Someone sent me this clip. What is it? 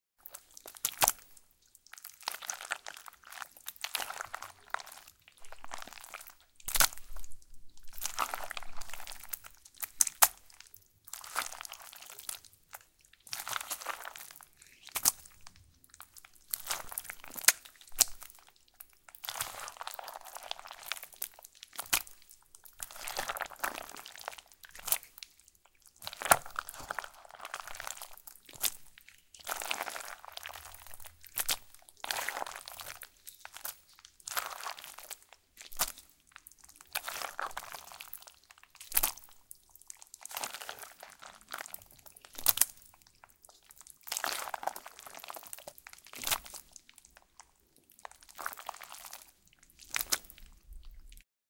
This sound can be useful for sounding effects associated with such non-humane acts as digging in the flesh and actions like this. In fact, here you only hear the sounds of digging in a plate with mushrooms boiled for grinding in a meat grinder. The mushrooms were freshly picked. Yummy. Thus, not a single living thing was harmed during this recording. My stomach too.)))If it does not bother you, share links to your work where this sound was used.